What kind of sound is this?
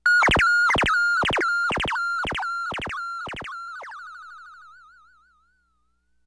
sample of a REAL syn drum-unedited-recorded direct thru Balari tube pre into MOTU/Digi Performer setup.